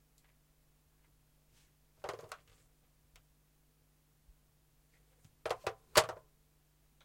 Telephone - Pick up hang up 03 L Close R Distant
Picking up and hanging up a lightweight modern home or office telephone. First take is picking up, second take is hanging up. Recorded in studio. Unprocessed.
akg, answer, answering, channel, close, distant, dual, foley, fostex, hang, hanging, home, light, lightweight, mono, office, perspective, phone, pick, picking, pov, rode, studio, telephone, unprocessed, up